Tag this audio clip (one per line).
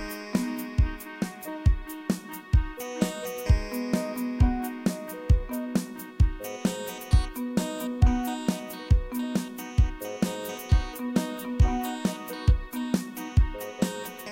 loop
original
keyboard
groovy